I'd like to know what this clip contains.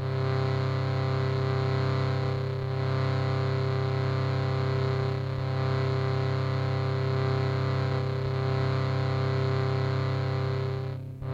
My recording of indian shruti box by condenser microphone Audiotechnica.
acoustic, background, swar, instrument, shruti, swarpeti, peti, shrutibox, surpeti, wooden, musical, indian, drone